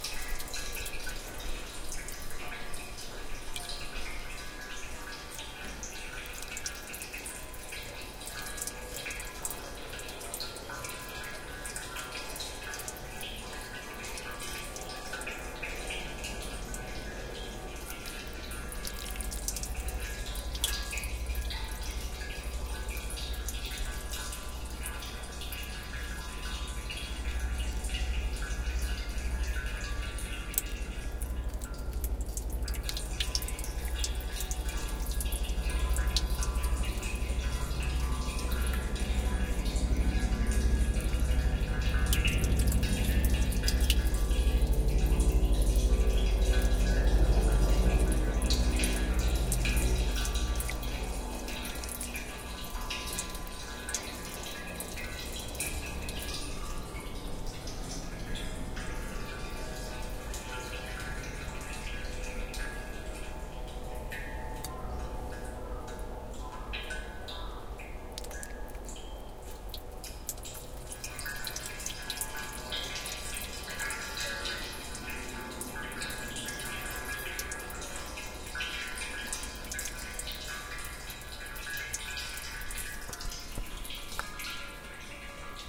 Suikinkutsu at Eikan-do Zenrin-ji
Suikinkutsu (Japanese sound/water-based garden ornament) at Eikan-dō Zenrin-ji, Kyoto, Japan. Recorded January 2014.